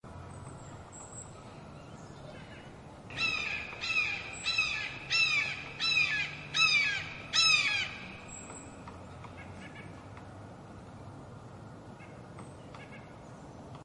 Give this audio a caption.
The cry of a Red-Shouldered Hawk flying slow figure eights over a lake in Mid-Winter.
Recorded on Sunday January 22nd, 2017 using the Sound Devices 702 with two Sennheiser ME66s.